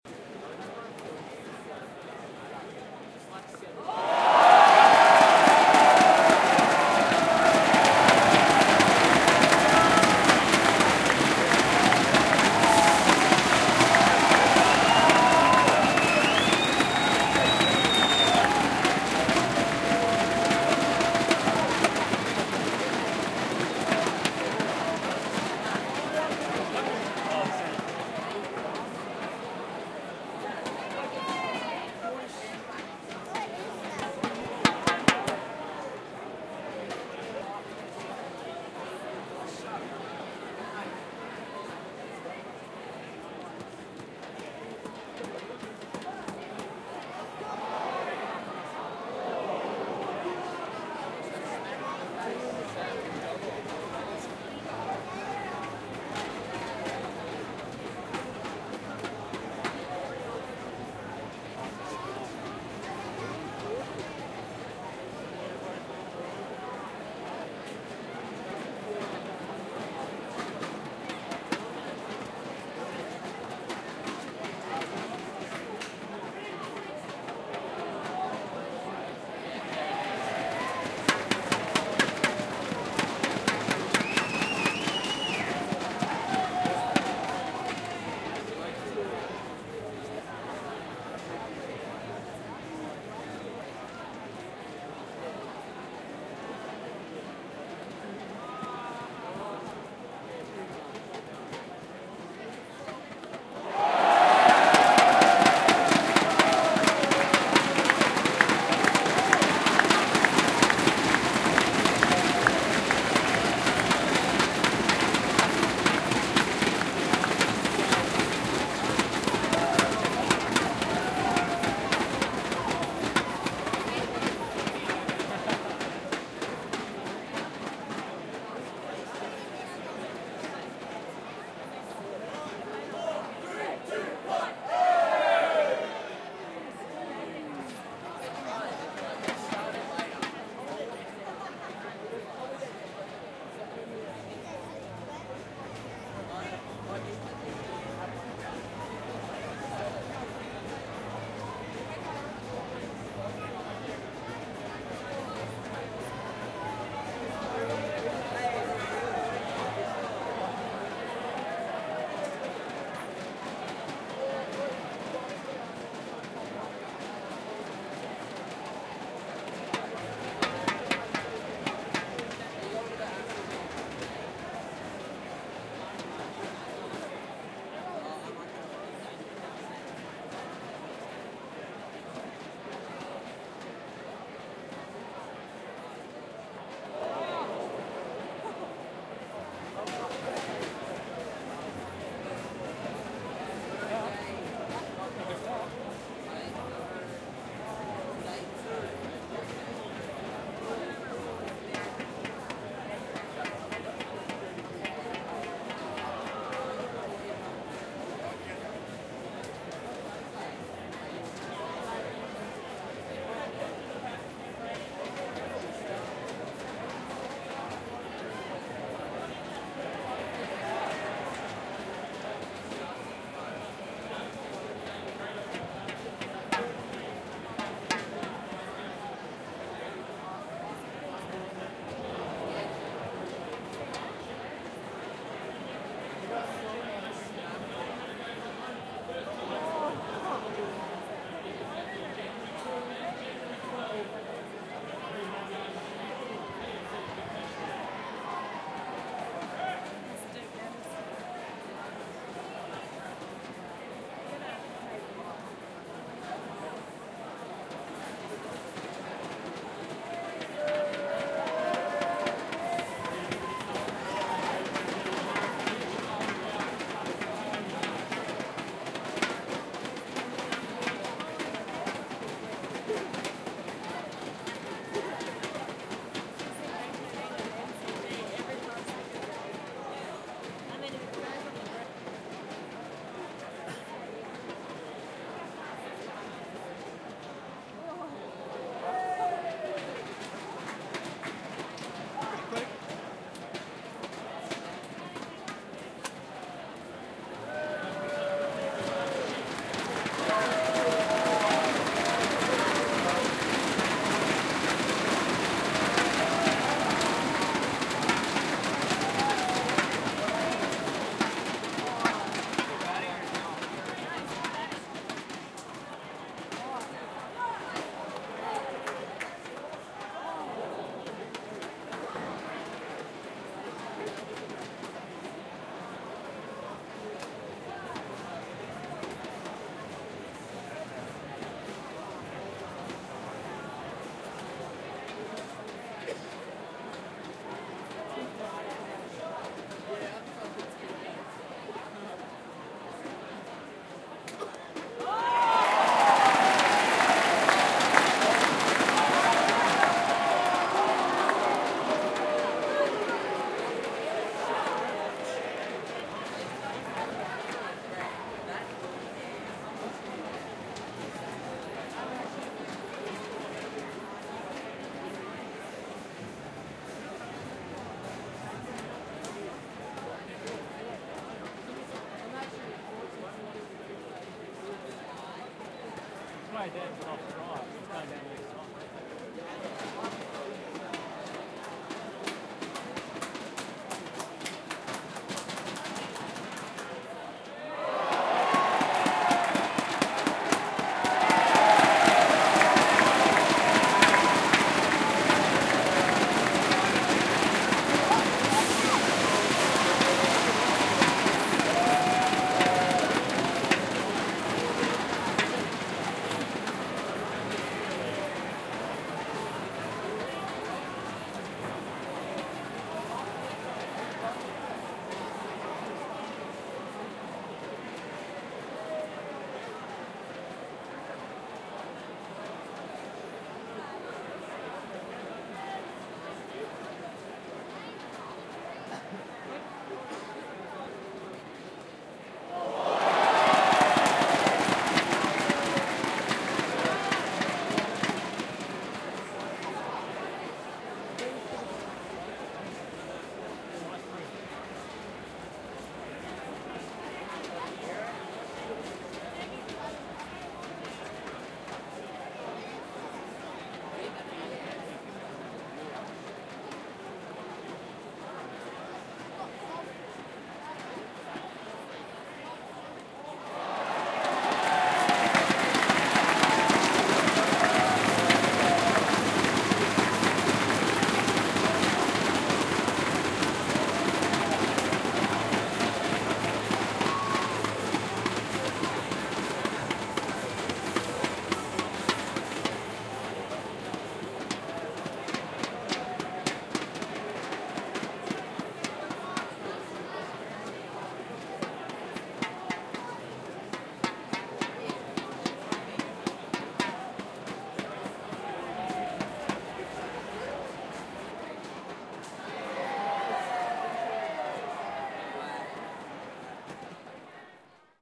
Cricket (Sport)
In the crowd, watching a 20/20 Cricket match between two Australian teams.
2020, cheering, Cricket, crowd, sport